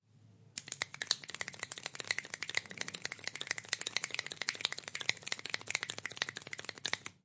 SFX - rapid finger snapping
Close-perspective recording of extremely rapid finger snapping.
Recorded for a sound design class prac using a Zoom H6 recorder with XY capsule set to 90º.